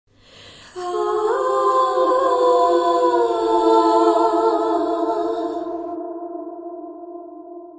harmonized singing sigh
Simple layered female vocal with a nice little reverb tail at the end. I scrapped this backup vocal from a song I was working on because it didn't sound right in the mix. Maybe it can be used by one of you, instead of just ending in my virtual trash bin.
Recorded using Ardour with the UA4FX interface and the the t.bone sct 2000 mic.
You are welcome to use them in any project (music, video, art, etc.).
female-vocal, harmony, lament, sigh, singing, woman